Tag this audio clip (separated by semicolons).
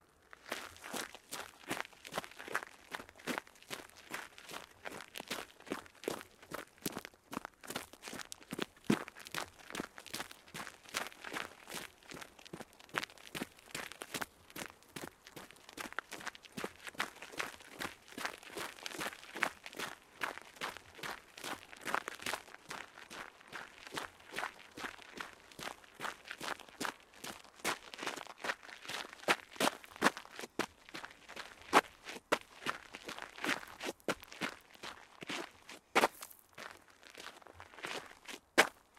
aussen; concrete; crispy; crunchy; dirt; draussen; dreckiger; exterior; fast; field-recording; foot; footstep; footsteps; gehen; laufen; path; run; running; schnell; schritte; slow; steiniger; step; steps; stone; walk; walking; way; weg